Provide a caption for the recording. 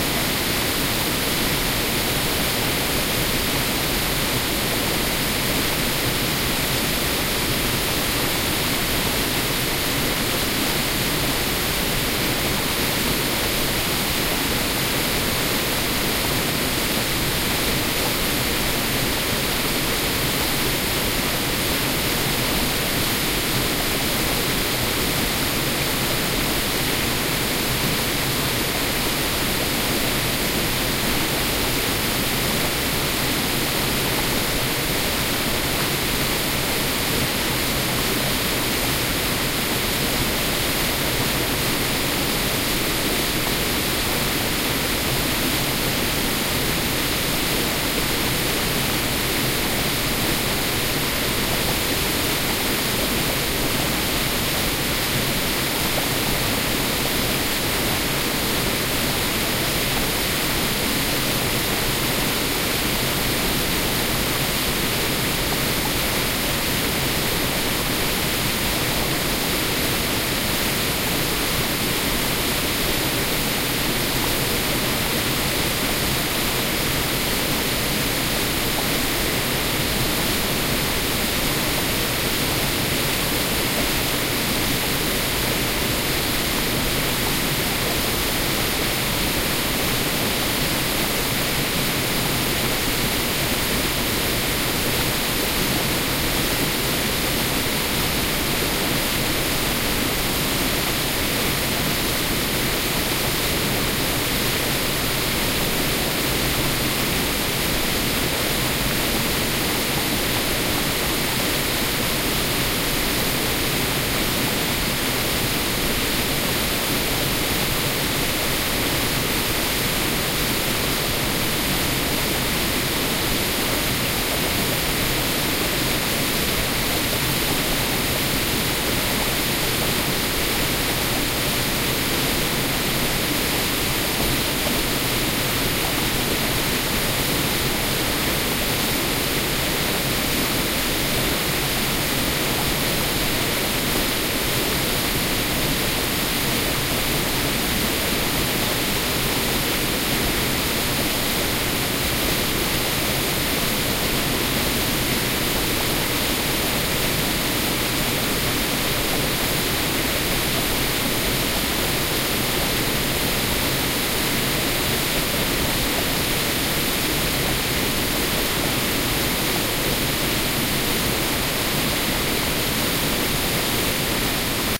Remix: Here is an edited version of sample 40132 originally recorded by inchadney. I just cleaned up a few gaps and chopped off the beginning to make it loopable. It's one of the most ideal natural samples for the application of white noise for sleep inducement/noise-masking I've heard yet on this site. Nice job inchadney!
tinnitus, sleep-inducement, insomnia, ringing-in-ears, white-noise, relaxing, relaxation, water, noise, soothing, tinnitus-management, loopable, waterfall, meditative, mask-noise, relax, meditation